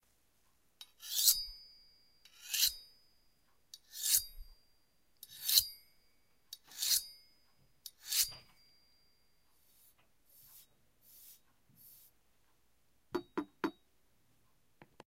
Battle Dagger Sharpen
Sound created by rubbing a fork on a butcher knife. Recorded with a black Sony digital IC voice recorder.